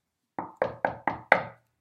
S01 knock-the-door
Door knock sound modified, shorter for use.
door, knock, wooden